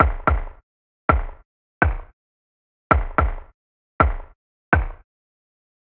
165 bpm - Broken Beat - Kick
This is a small Construction kit - Lightly processed for easy control and use ... It´s based on these Broken Beat Sounds and Trip Hop - and a bit Jazzy from the choosen instruments ... 165 bpm - The Drumsamples are from a Roality free Libary ...
Beat, Broken, Loop, Kick, Construction, Kit